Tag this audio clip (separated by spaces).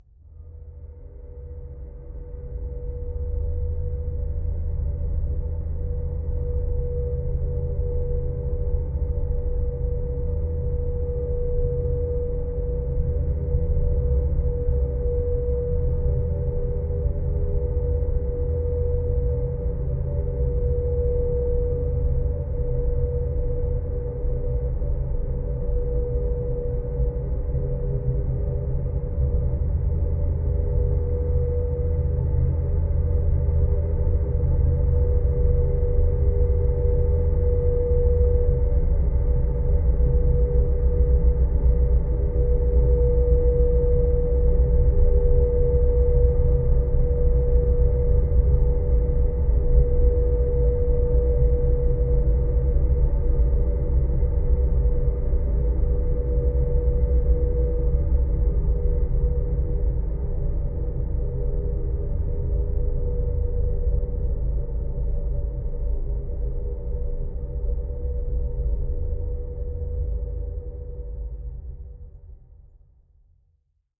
multisample soundscape drone background industrial